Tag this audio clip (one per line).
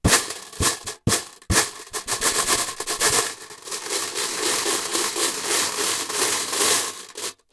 game
glass
rhythm
mancala
swirl
metal
clatter